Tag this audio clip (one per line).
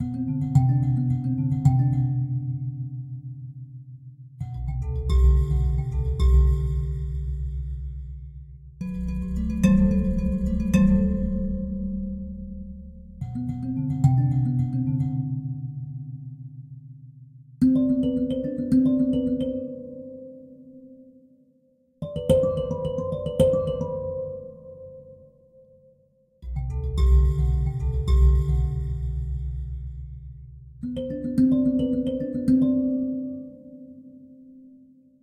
key
C
morphagene
mbira
Spliced